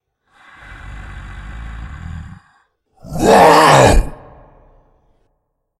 Monster growl and roar sounds. I recorded my own voice with a Blue Yeti mic, then edited the sound in Audacity.